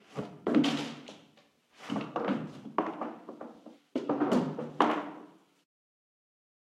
Plastic chair knocked over
Kicked and dropped a plastic chair onto the carpet
Recorded on the Zoom H6
Chair, Kicked